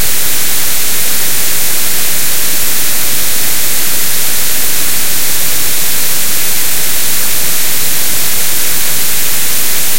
WHITE NOISE-10s
Harsh white noise generated from Audition.